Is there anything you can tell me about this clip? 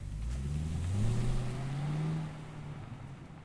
vehicle holdenssv driveoff
holden ssv ute driving away from mic. recorded from rear.
driving, holden, off, ssv, vehicle